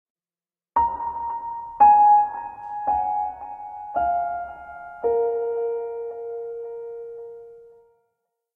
Mellow piano phrase, 4 notes descending, part of Piano moods pack.
calm, mellow, mood, phrase, piano, reverb